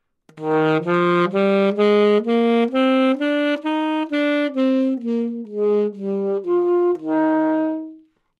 Part of the Good-sounds dataset of monophonic instrumental sounds.
instrument::sax_alto
note::D#
good-sounds-id::6677
mode::major
Intentionally played as an example of scale-bad-dynamics-staccato